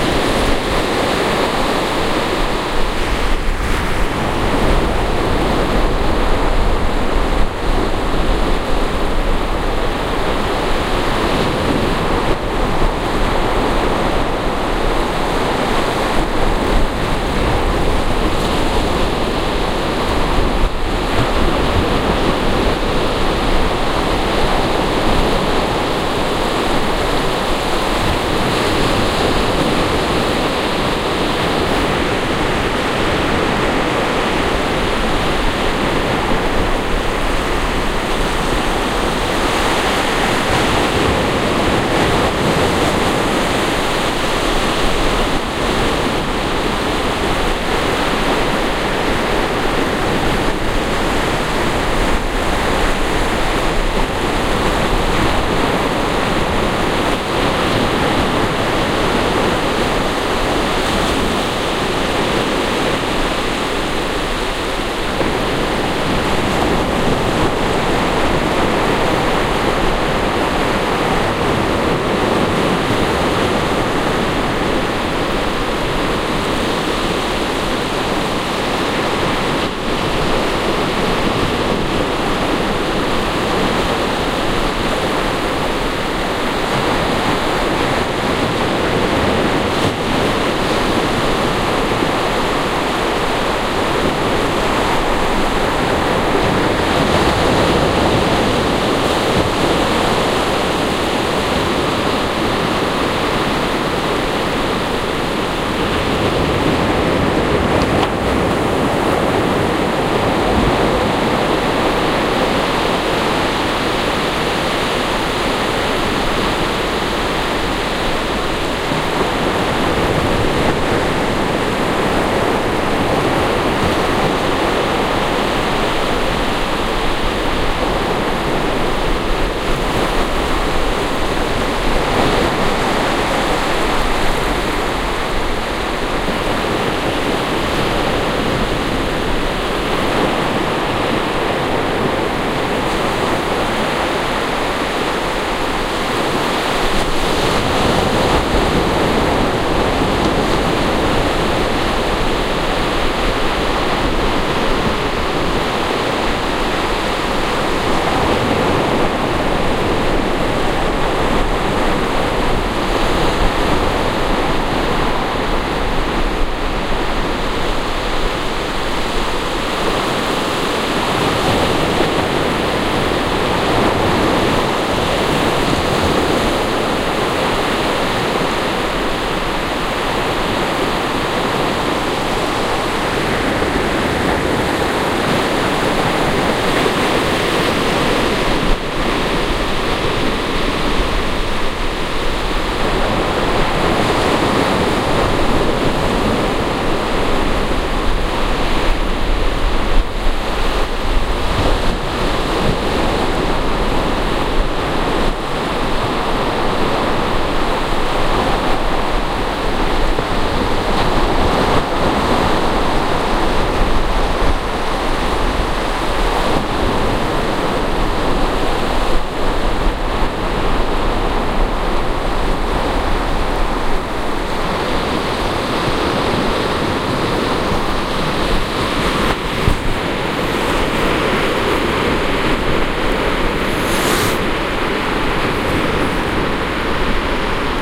Henne beach waves at night
Recorded at Henne beach, Denmark. I decided to go to Henne one evening, to see if i could get some waves, without too much interference from the wind. But of course it got windy, the moment i unpacked the microphones and recorder. That figures. Well i think this chunk of audio came out better than i expected.
This was recorded with a Sony HI-MD walkman MZ-NH1 minidisc recorder and a pair of binaural microphones. Edited in Audacity 1.3.9
denmark, henne, beach